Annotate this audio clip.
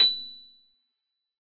Piano ff 087